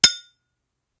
A bunch of different metal sounds. Hits etc.